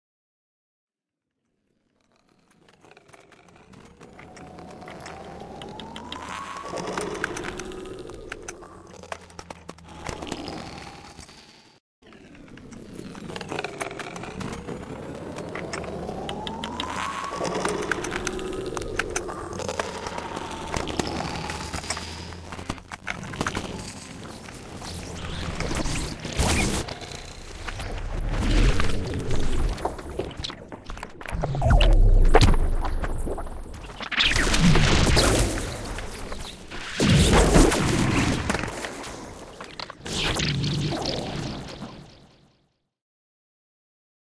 what if you could plant a pair of bionic ears on a chain of dust particles in the air and amplify that? this is a sound collage attempt to simulate the hearing-view of dust particles while they're being lifted and blown by the wind. done mainly with various spectral transformations of pinknoise, feedback and contact-mic recordings. sound sources were processed with granular time-stretching, spectral filtering, spectra-multiplication, reverb and pitch shifting.
processed, field-recording, competition, wind, feedback, granular